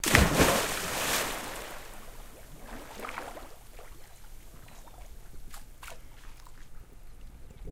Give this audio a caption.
-Canon-ball into backyard pool